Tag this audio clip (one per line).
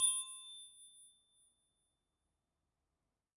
dissonant
spanner
tonal
chrome
hit
Wrench
percussive
high
ring
metal
harmonics